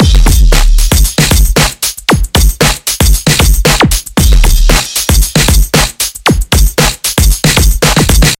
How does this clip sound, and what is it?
big beat, dance, funk, breaks